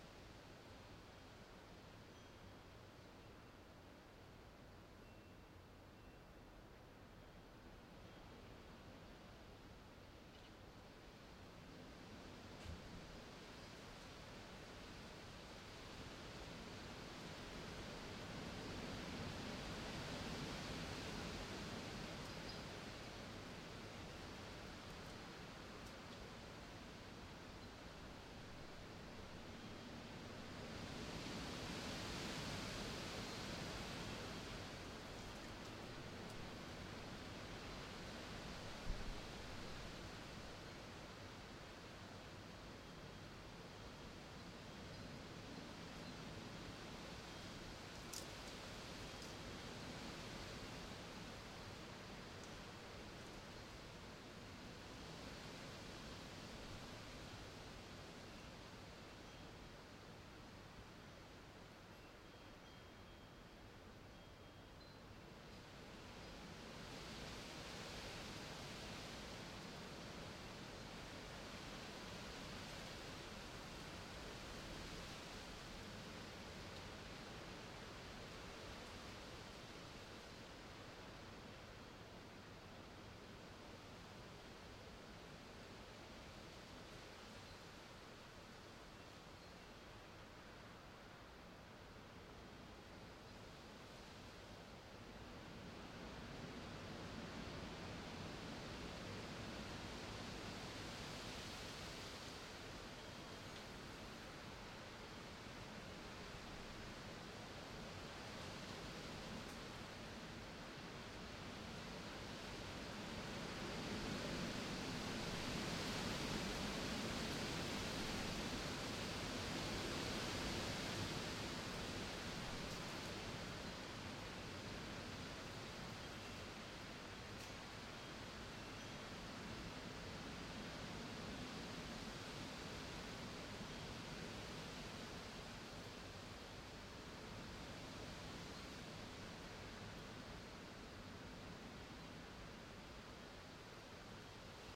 Heavy wind chimes trees foliage rustling
Very windy night in Los Angeles. Recorded this by opening the window to the balcony and pointing the mic outside, through the mosquito screen. You can hear the neighbor's windchimes, as well as some leaves rustling on the ground.
Rode NTG2 and Zoom H4N